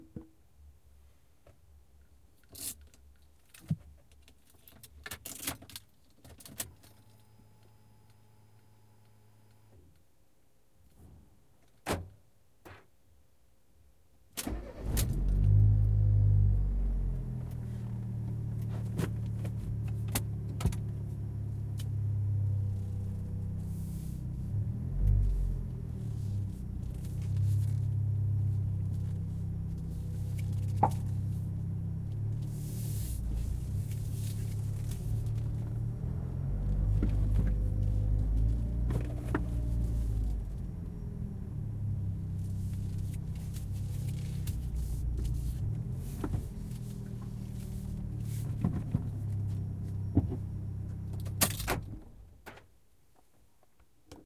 car start ignition
car being turned on, key, gear and acceleration
motor, vehicle